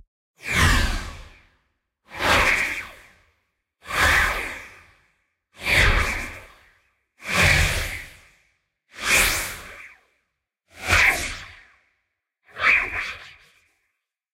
Tonal Whoosh
air, swoosh, tonal